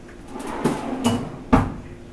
Drawer close
door, open, drawer, kitchen